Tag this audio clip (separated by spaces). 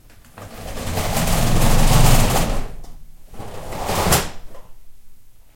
close closing gete open opening shutter